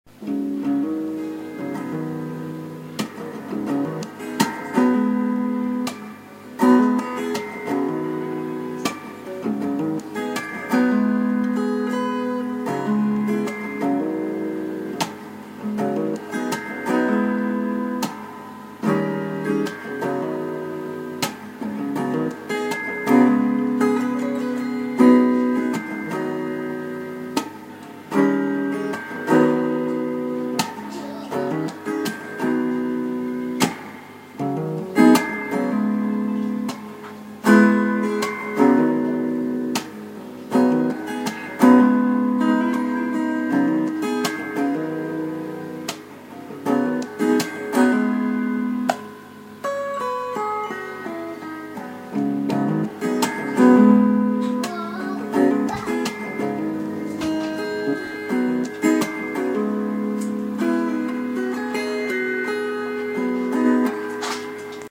Uneek guitar experiments created by andy
Guitar, instrumental, strings